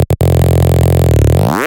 Bass Loop 2
Bass Loop made in FL Studio (:
4, Bass